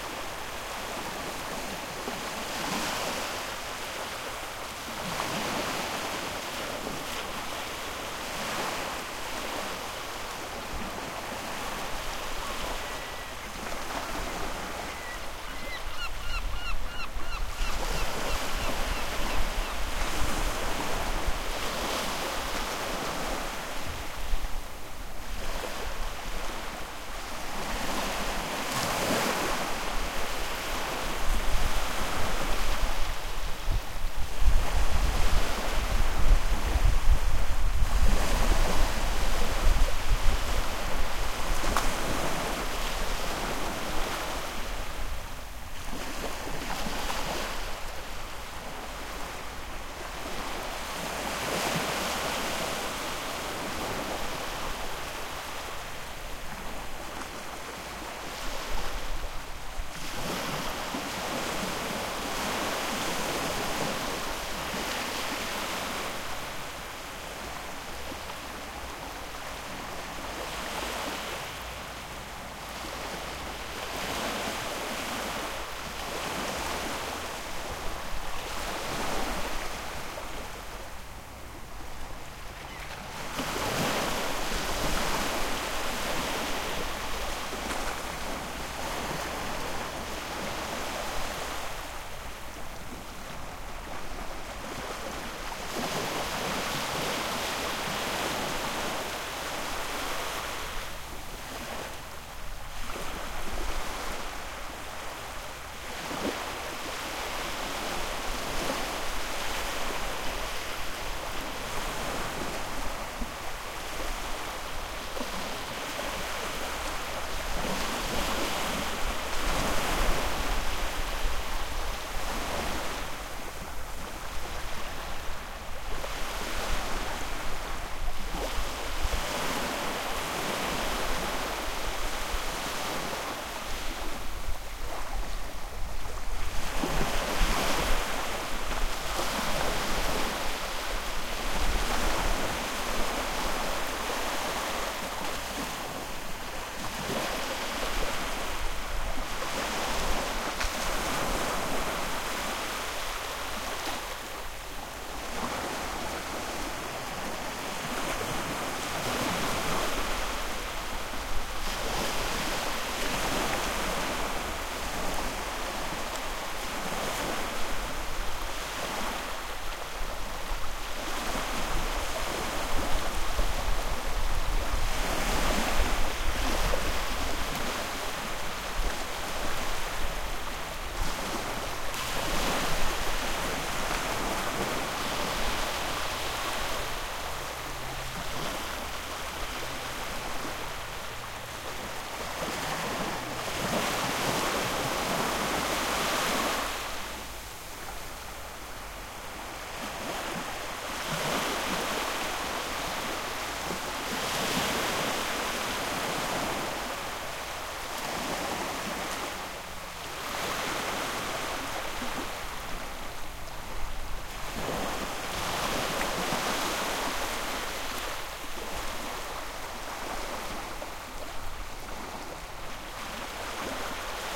The Northsea at the scottish coast.
Sony PCM-D50 recorder and selfmade windjammer.